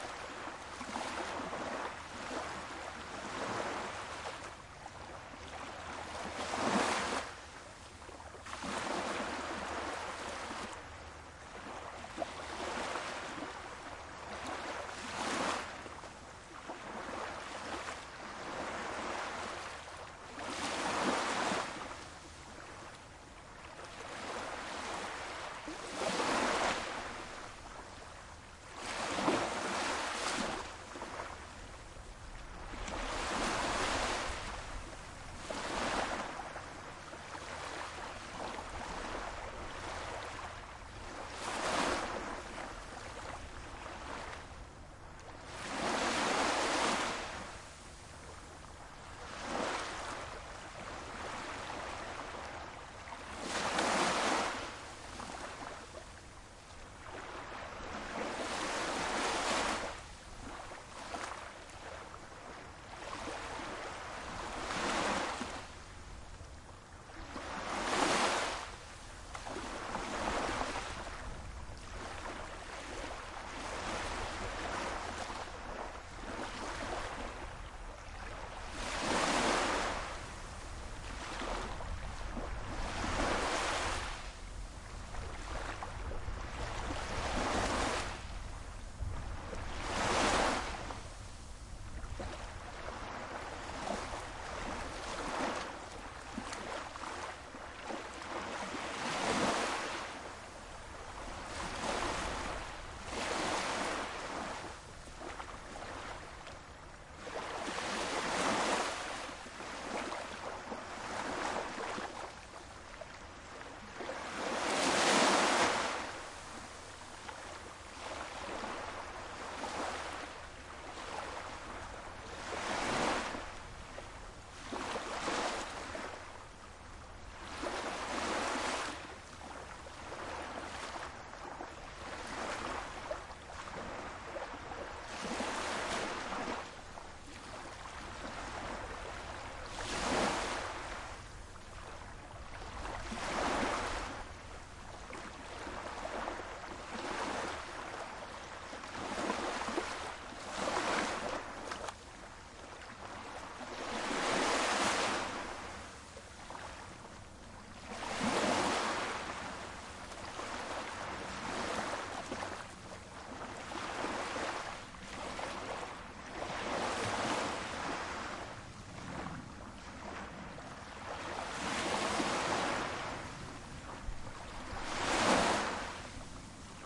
Mui Wo waves
Recorded on the beach of Mui Wo, Hong Kong. At mid night, no people. Recorded with MKH418 and Roland R4-Pro.
beach, night, ocean, sea, seaside, shore, water, wave, waves